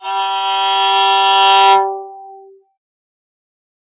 detache G note on a terrible sounding unamplified electric violin. no reverb. noise processed out.